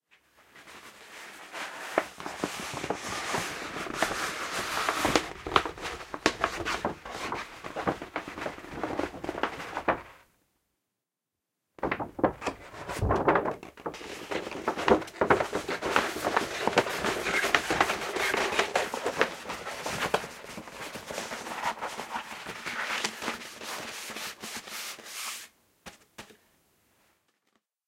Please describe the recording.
Unrolling And Rolling Map
A stereo recording of unrolling and re-rolling a cartridge-paper map. Rode NT4 > FEL battery pre-amp > Zoom H2 line-in.
chart, map, paper, parchment, request, roll, scroll, stereo, tube, xy